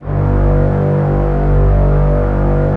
02-synSTRINGS90s-¬SW
synth string ensemble multisample in 4ths made on reason (2.5)
strings, g0, synth, multisample